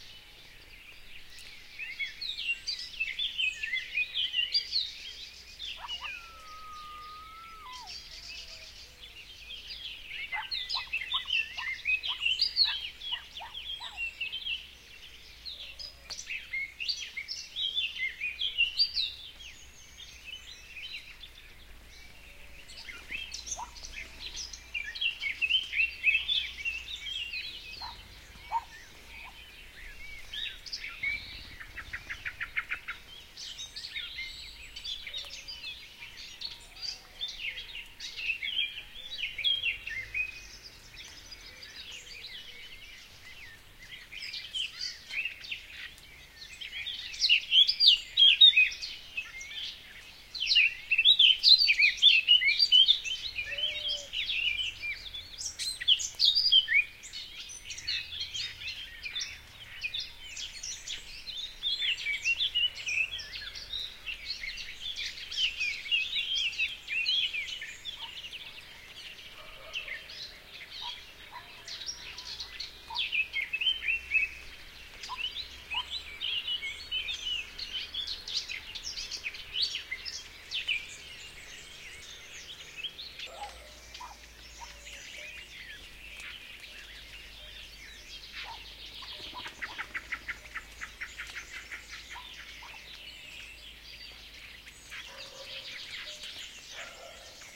countryside late-afternoon ambiance with lots of birds singing (mostly Blackcap; thank you Reinsamba, some day I should learn!), distant dog barking, vehicles... Recorded near Carcabuey, S Spain, in a site with Olive groves and Pistacia Scrub.
ambiance, birds, blackcap, chirps, field-recording, nature, south-spain, spring